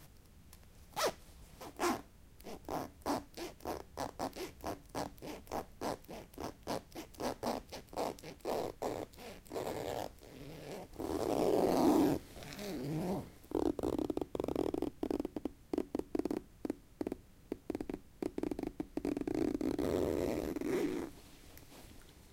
Just a little zipper collection.